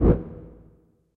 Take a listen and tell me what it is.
This sample was created taking as a starting point a synth perc sound from a VST percussion synth. After I manipulated the settings to give it a longer attack, it now sounds like the synth "woosh" sound I was looking for.This sound is intended to be part of an electronic or glitch "percussion" set.
artificial, effect, fx, synth, synthetic, woosh